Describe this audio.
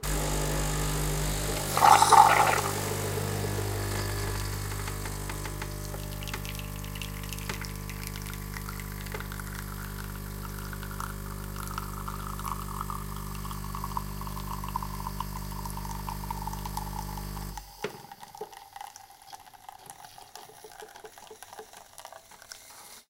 Saeco Incanto Delux doing it's thing.